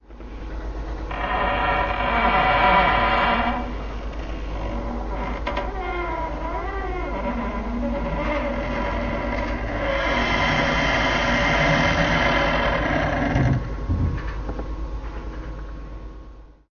CABINET SQUEAK(echo)
This was a squeaky cabinet hinge. I added the effect to give it a 'haunted house' sound. Thanks. :^)
Hinge, Spooky, Squeak